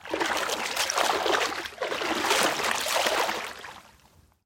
Water slosh spashing-5
environmental-sounds-research, splash, water